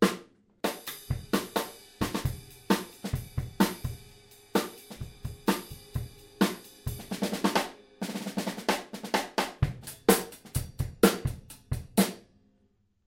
supra beat straight hihat ride
A few bars of pop / rock drum beats with hihat and ride. Ludwig Supraphonic used.